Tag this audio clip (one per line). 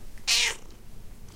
cat
meow
scrowl